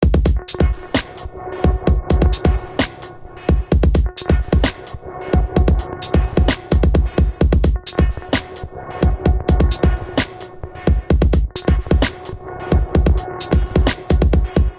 now beat synth 1
These sounds are from a new pack ive started of tracks i've worked on in 2015.
From dubstep to electro swing, full sounds or just synths and beats alone.
Have fun,
Bass, beat, Dance, Dj-Xin, Drum, Drums, EDM, Electro-funk, House, loop, Minimal, Sample, swing, Synth, Techno, Trippy, Xin